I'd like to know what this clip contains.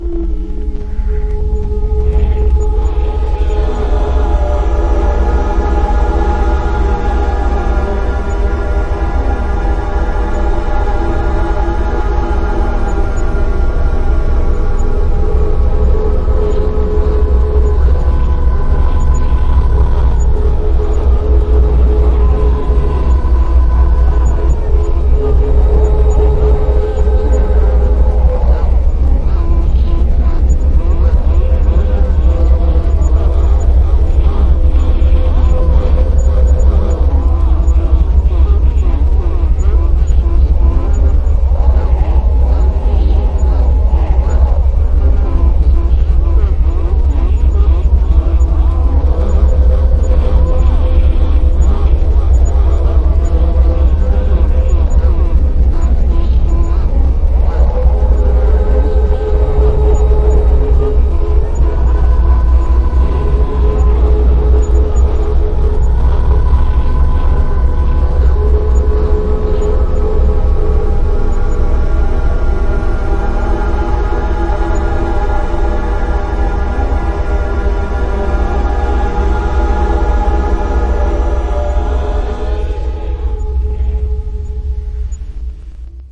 tape emission 2
processed vocal recording through a modified sony tcm-200dv cassette recorder